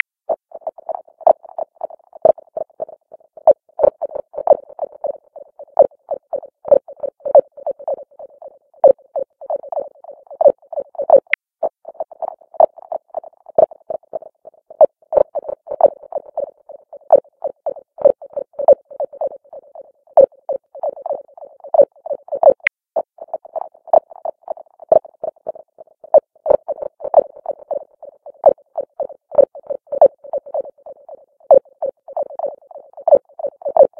CHATTER TWO SONAR
This is a basic sonar bleep, blip, and actually the pings are bat chatter reworked in audacity.